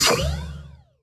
Sounds like a space age weapon - a "blaster". This is an example of DSP.